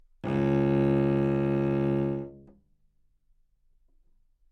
Part of the Good-sounds dataset of monophonic instrumental sounds.
instrument::cello
note::C#
octave::2
midi note::25
good-sounds-id::4266